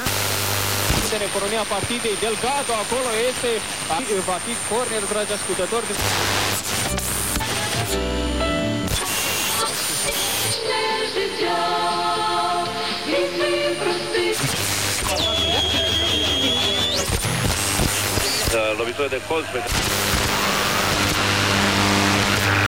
radio sound
Radio tuning sound on AM frequency.
am, dial, hiss, radio, scan, sound, stations, sweep, tuning, tunning